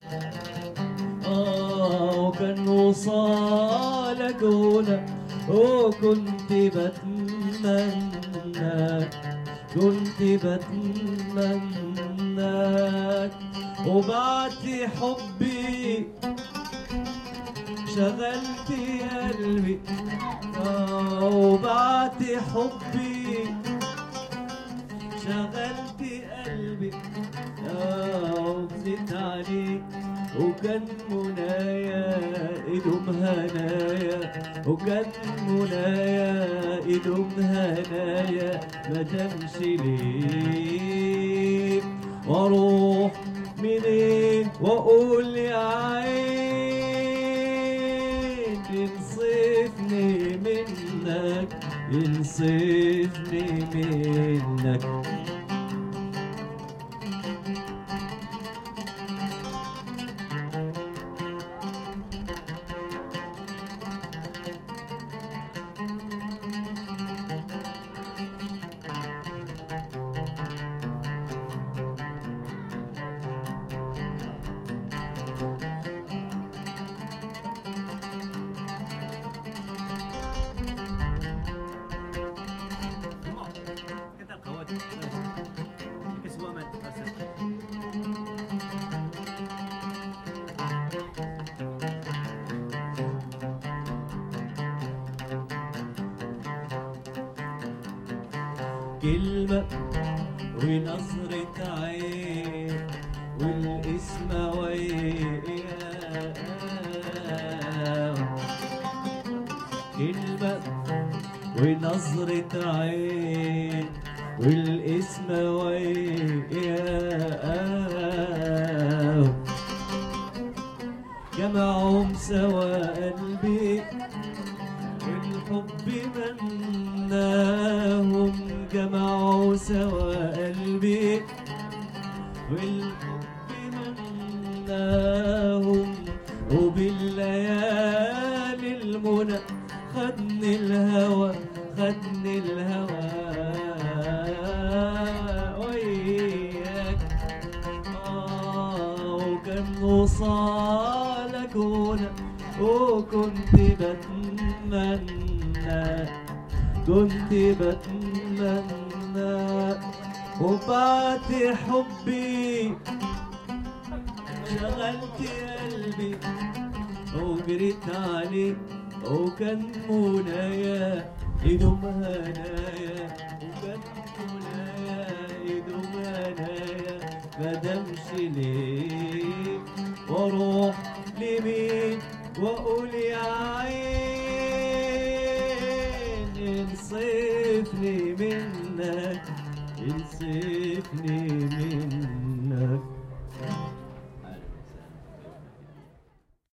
Playing the guitar 2 (authentic)
Recorded in Agadir (Morocco) with a Zoom H1.
play, music, Morocco, authentic, distance, street, Agadir, ambience, guitar, Arabic